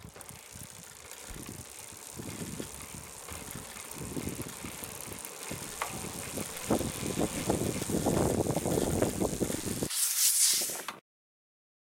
Brake Concrete Med Speed OS
Mountain Bike Braking on Concrete